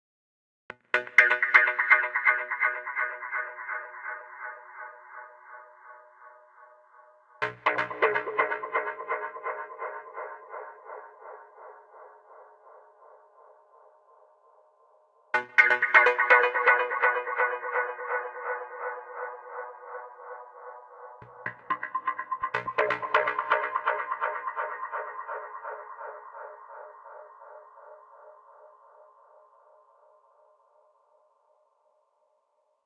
ae synthFxEcho 123bpm
echo, fx